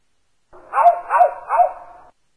auauau reducedspeed
however here I reduced it to 25% of the original speed. Just listen to that
amazing voice! It is rather similar to a human being or a dog than to a
bird.